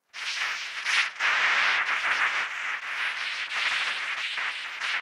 The parameters used for the analysis were:
- window: blackman
- window size: 883
- FFT size: 1024
- magnitude threshold: -70
- minimum duration of sinusoidal tracks: 0.1
- maximum number of harmonics: 100
- minimum fundamental frequency: 300
- maximum fundamental frequency: 1000
- maximum error in f0 detection algorithm: 7
- max frequency deviation in harmonic tracks: 0.01
- stochastic approximation factor: 0.1